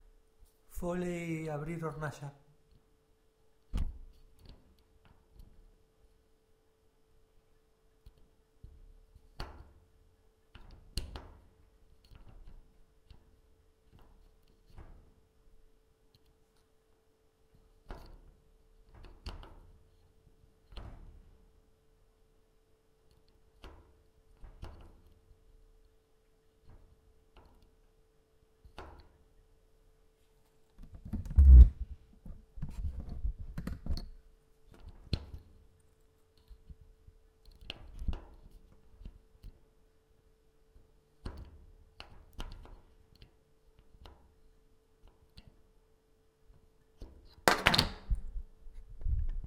Kitchen Stove
foley, kitchen, Stove